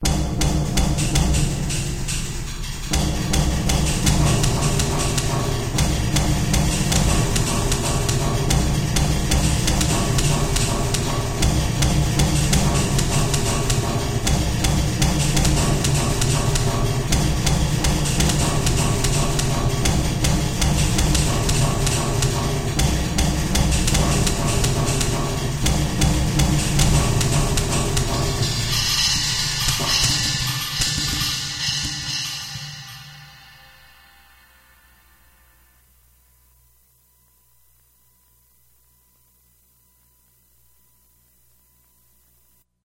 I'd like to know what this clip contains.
spanish war drums on million gal fuel tanks with tablas cymbals at end
beat, drum, drum-loop, groovy, percussion, percussion-loop, rhythm